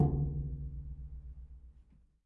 Hitting a metal container